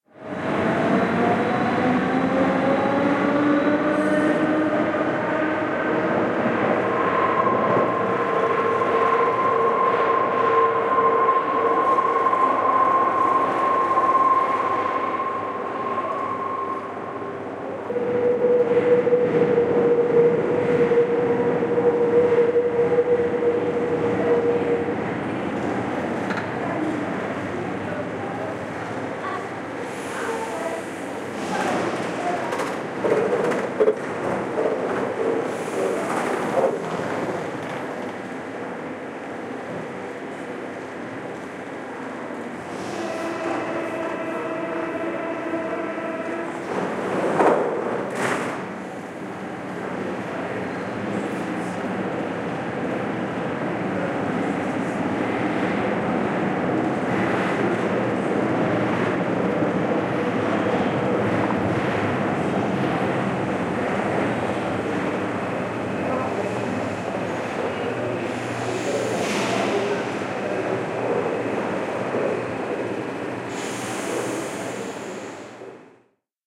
recording in a busy subway station in Paris, with trains approaching, braking, doors opening and closing. Recorded with mic Sony ECM MS907 and Sony MD MZ-N710
subway, paris, ambient, transportation